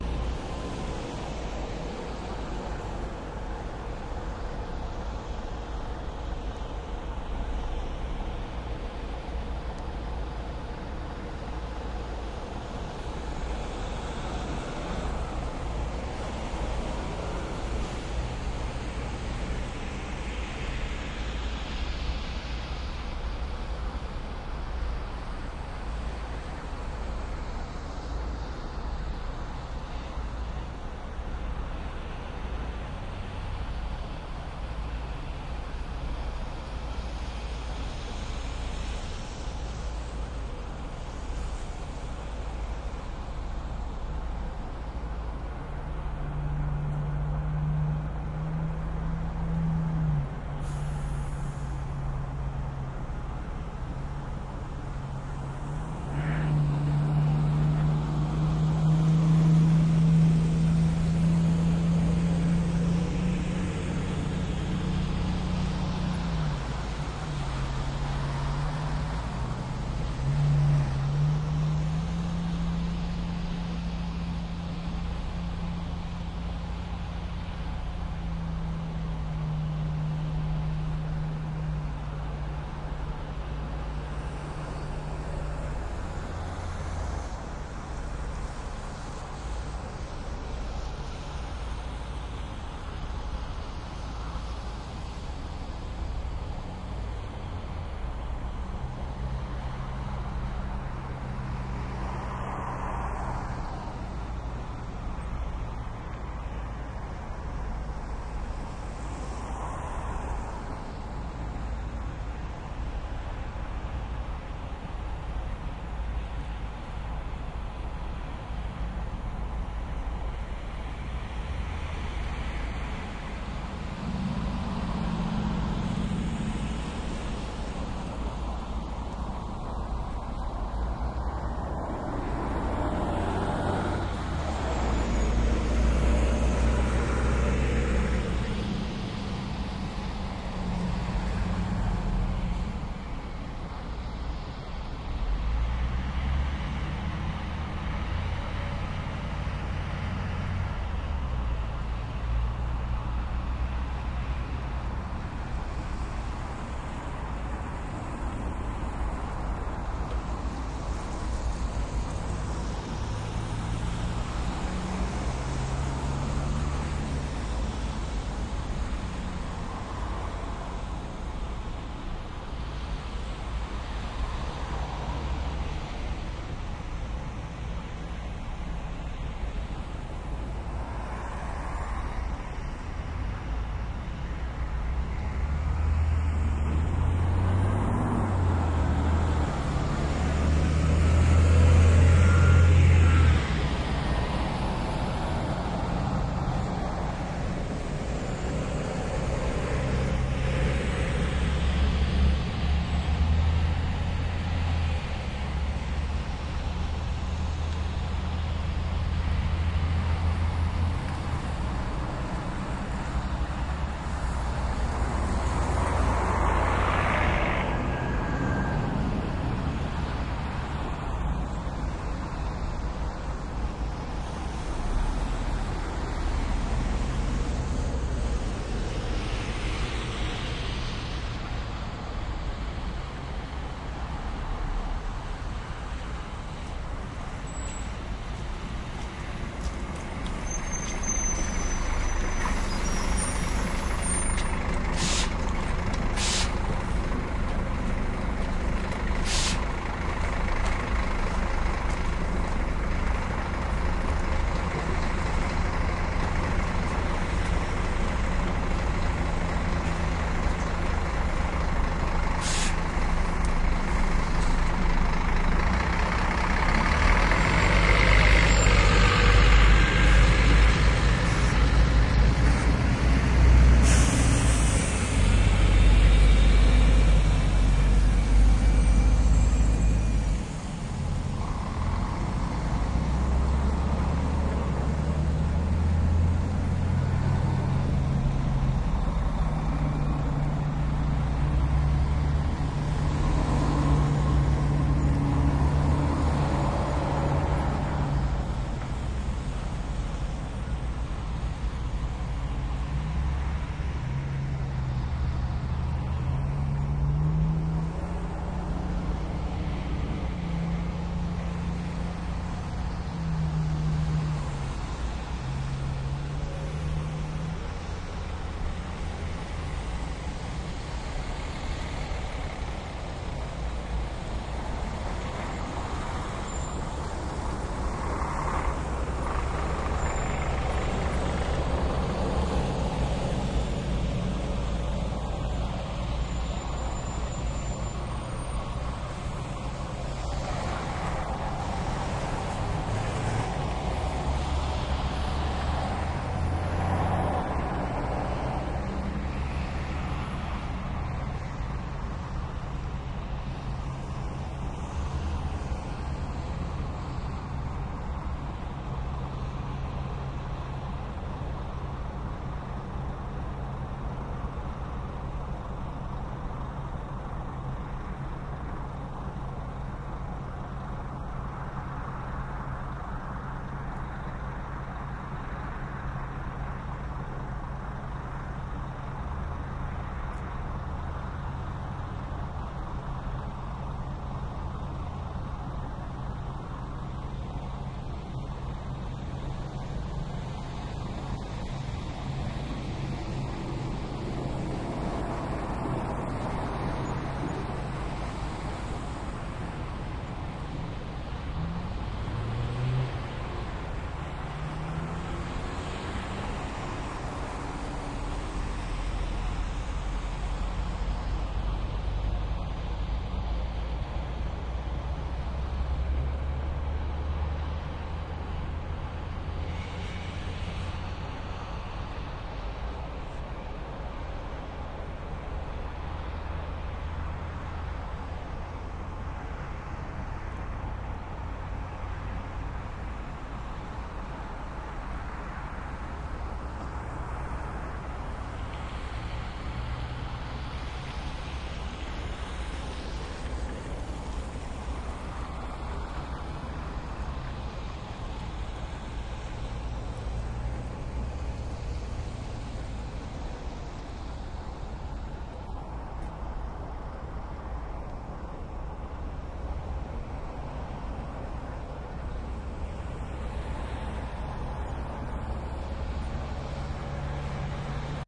Ågotnes Terminal Binaural
Ambisonics (surround) field recording done at Ågotnes bus terminal at the Sotra Island west of Bergen, Norway. Busses arriving and departing, more distant traffic. This file has been uploaded in three versions: 4-channel ambisonic B-format, binaural decoding using KEMAR HRTF, and a regular stereo decoding.
Equipment: SoundField SPS200, Tascam DR640. Decoding is done using the Harpex plugin.